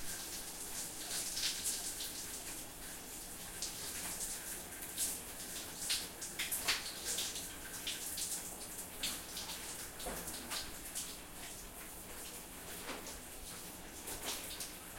Person Showering
bathroom, water, shower